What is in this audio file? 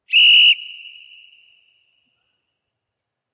Referee's whistle blowing inside a gymnasium